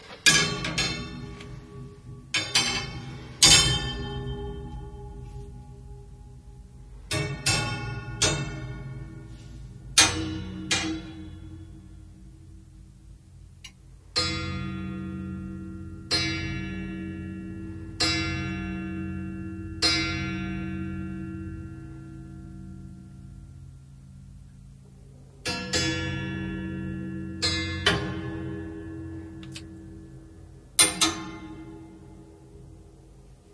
various mysterious noises made with wire iron pieces. Sennheiser MKH60 + MKH30 into Shure FP24, PCM M10 recorder